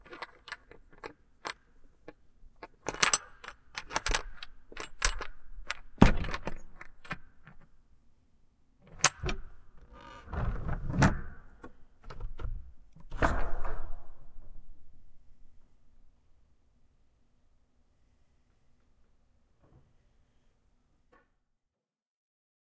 ouverture-contenair 1
opening of truck container.
action, container, empty, move, noise, truck